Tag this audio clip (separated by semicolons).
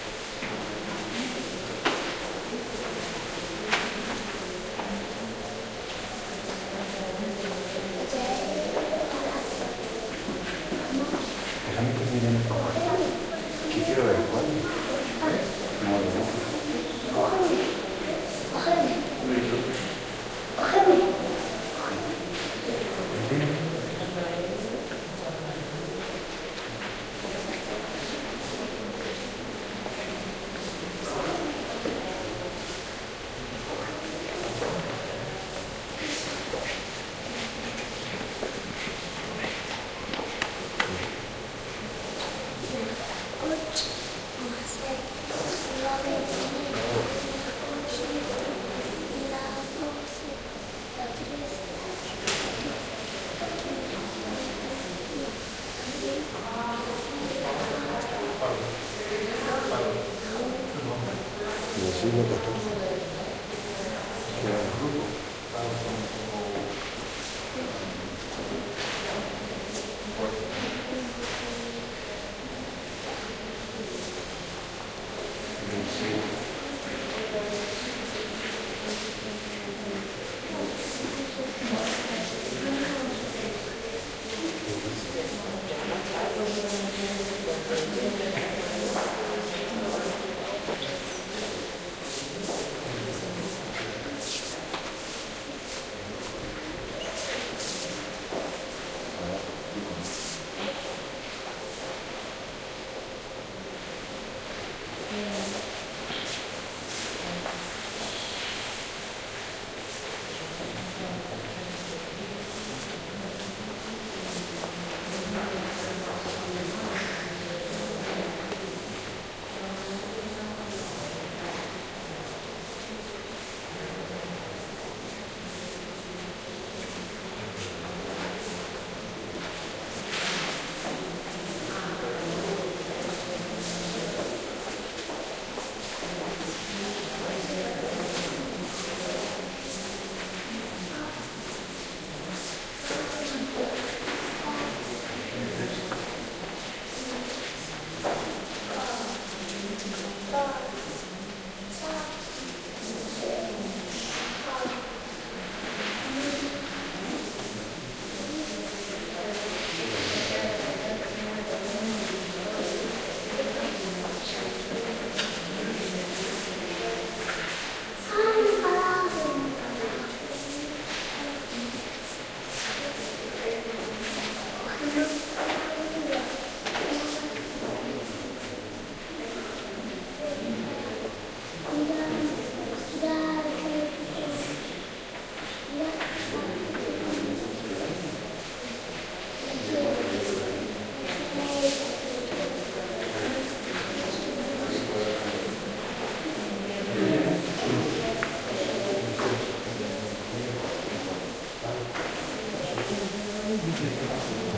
ambiance; exhibition; field-recording; museum; spanish; voice